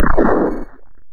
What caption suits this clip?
An explosion sounds for a retro style asteroid game...maybe. Who knows what I was doing.